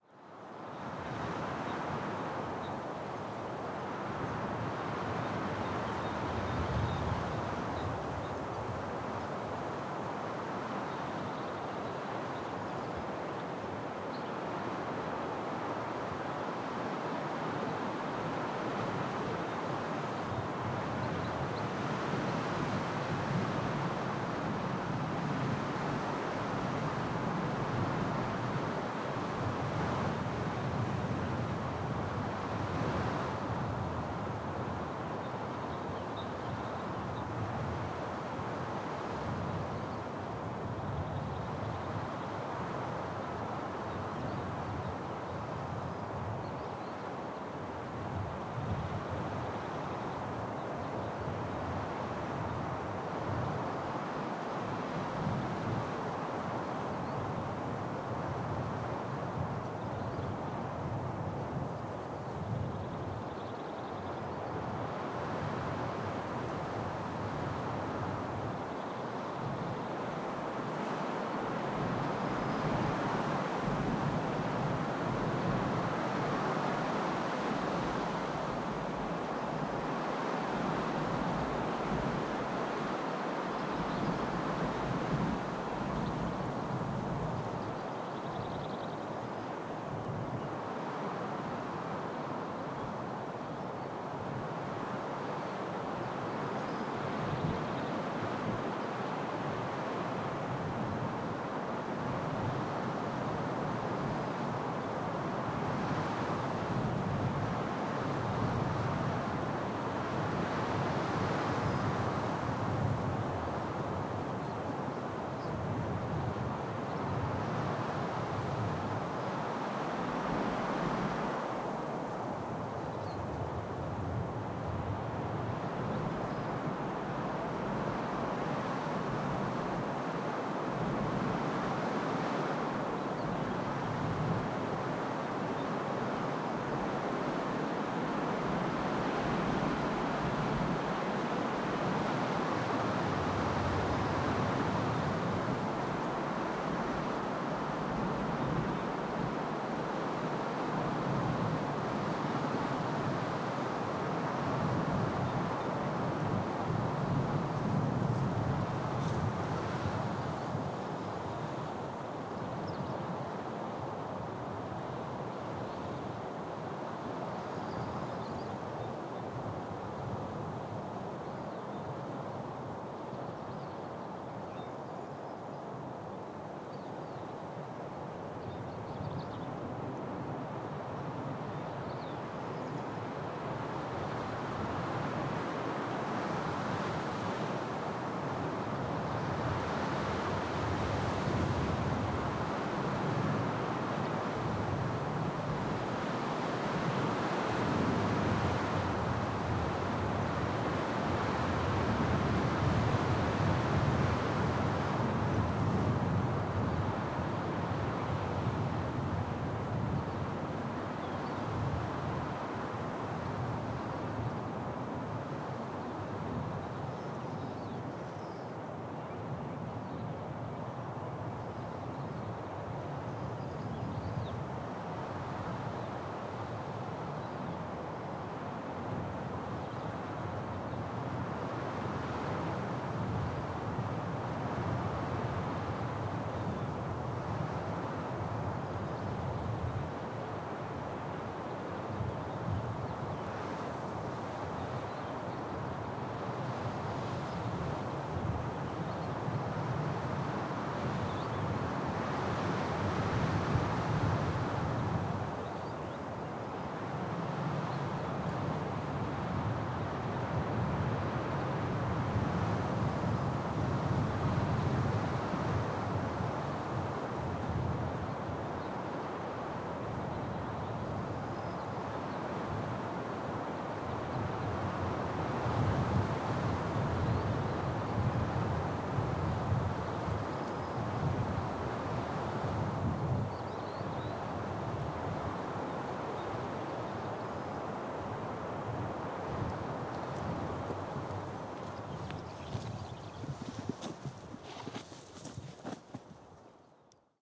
AMB Bardenas wind birds leaves strong MOD 2
Strong winds from the Spanish Badlands!
Mono field recording with an AKG C91 cardio in a windjammer, into a AETA Mixy onto an Maudio Microtrack via spdif, sometime around Spring 2011.
Recorded in the Bardenas Reales desert in Spain.
This particular recording was done a day of high winds on the hills overlooking the Bardena, close to a mass of shrubbery with birds nesting in it!
It's pretty dense, the infra gusts have been somewhat tamed but are still quite present!
wind, infra, spain, trees, bass, bardenas, birds, leaves, strong, desert, reales, nature, field-recording